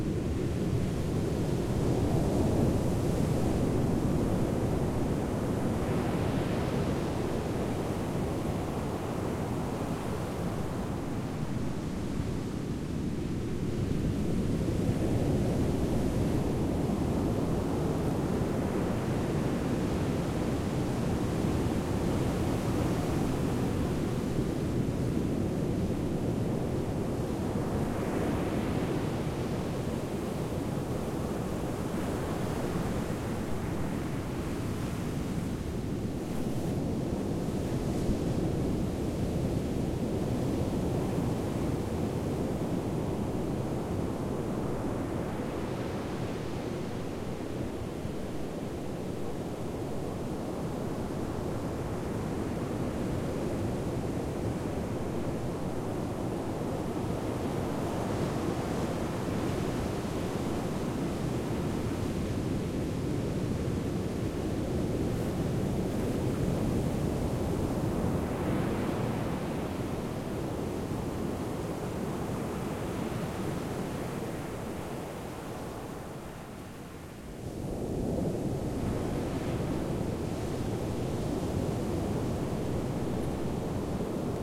Storm waves breaking on sandy beach in Bournemouth - clean recording
Clean recording with no wind noise of big waves breaking on a sandy beach at night, from a few metres away. Recorded handheld on a Tascam DR-22WL with a Rycote softie in practically windless conditions.
ocean, sea, white-noise, seaside, stereo, wildtrack, big, sandy-beach, clean-recording, coast, tascam, storm, soft, atmos, surf, booming, nature-sounds, beach, sand